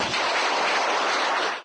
Specific details can be read in the metadata of the file.